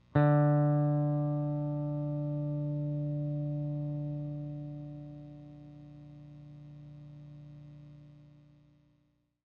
The D string of a Squire Jaguar guitar.
electric, sample, note, jaguar, squire, guitar, string